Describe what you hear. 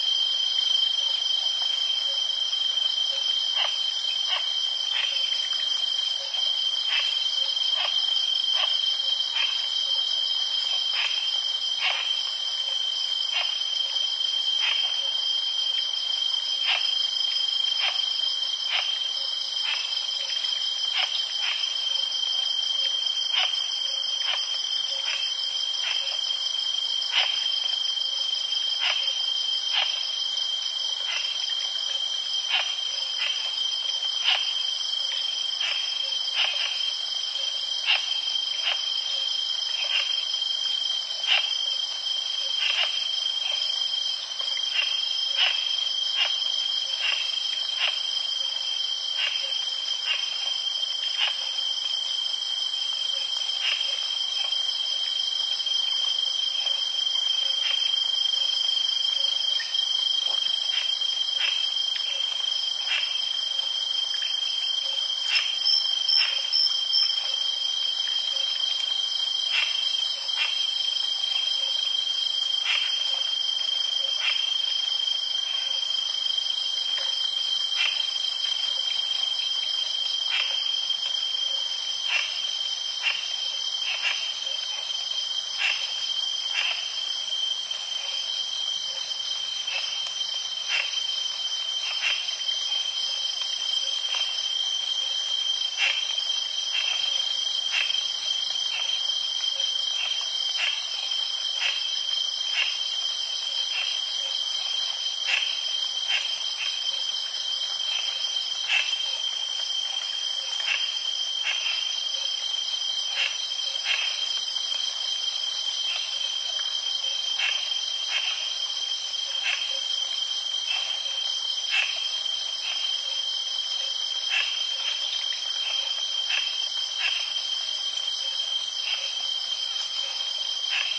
Thailand jungle night creek heavy crickets and bird chirps squawks